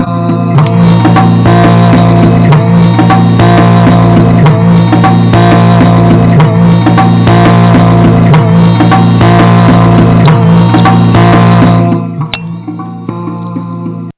pah-dunk-AH 1
this is the looping pah-dunk-AH sound of a record skipping on a broken turntable.
skipping, record